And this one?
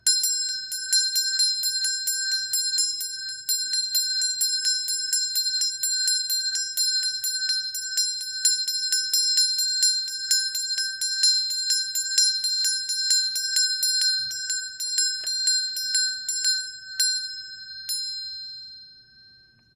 The ringing of a bell.